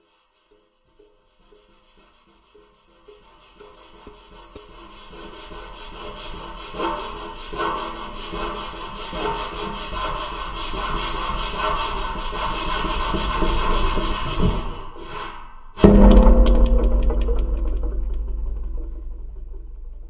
contact mic on satellite dish04
Contact mic on a satellite dish in my backyard. Rubbing the dish and then plucking the edge with my finger.